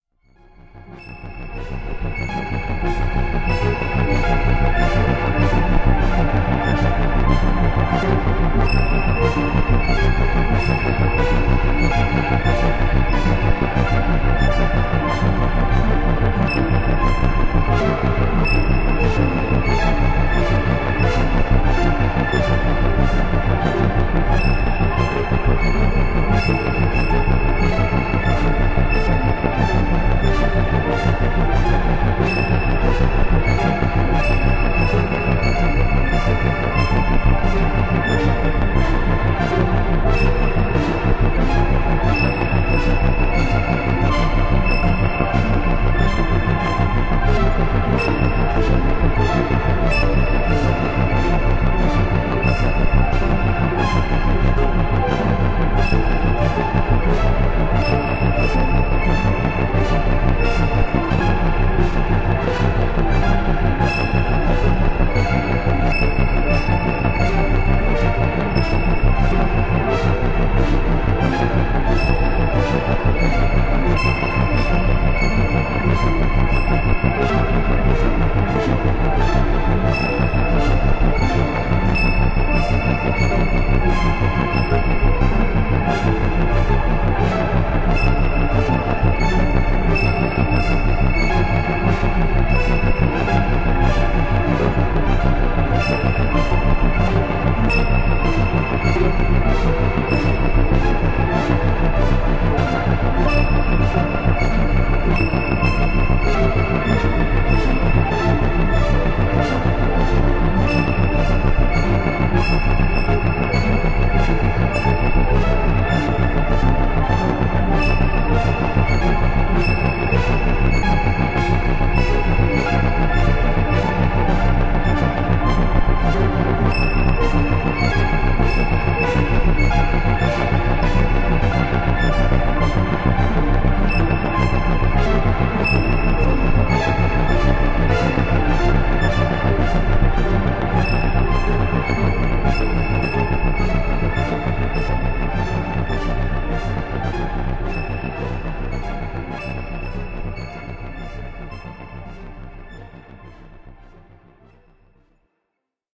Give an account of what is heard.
Methyl Swamp
weird, dark, ambient, groovy, bass, alien, cosmos, future, fun, science, astro, moog, soundtrack, tension, soundesign, space, music, movie, sci-fi, electronic, synth, strange